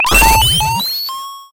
SFX Powerup 36
8-bit retro chipsound chip 8bit chiptune powerup video-game
8-bit, 8bit, chip, chipsound, chiptune, powerup, retro, video-game